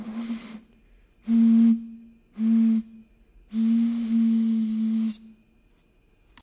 Blowing in water Bottle Manipulation
Blowing into the top of a plastic water bottle. This is the manipulated file.